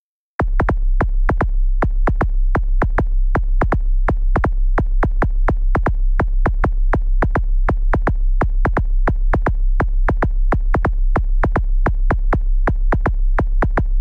drum main theme
electronica, riff, soundscape, synth